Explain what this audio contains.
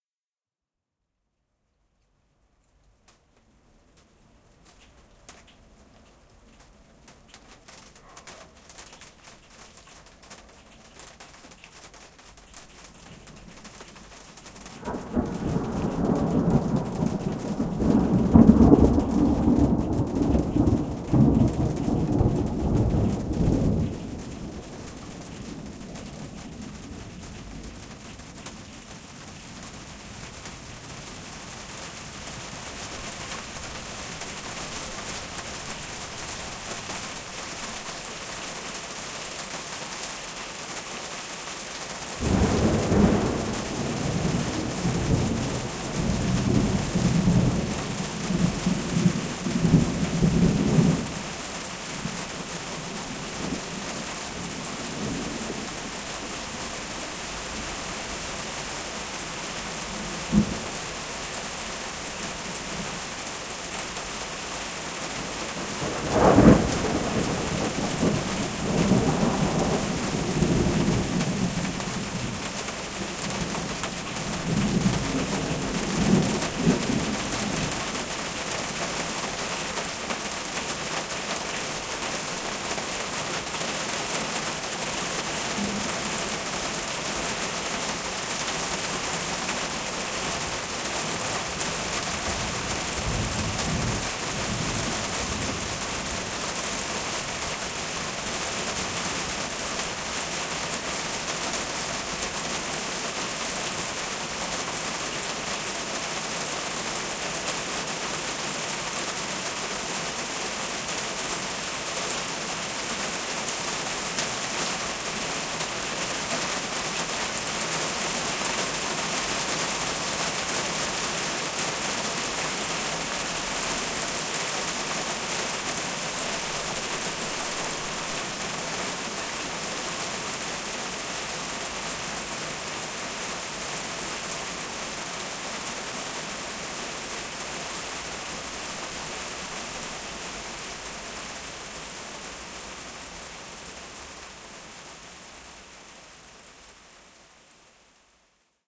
Thunder and Rain
Nature, Thunder